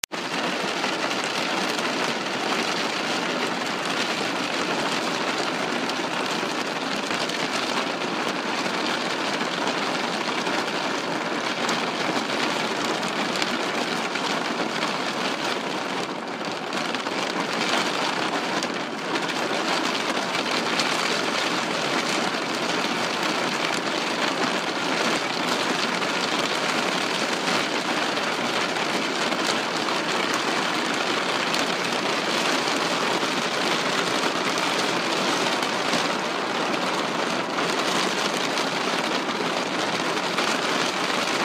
Very Heavy rain from inside my car, recorded with an iphone.
rain, storm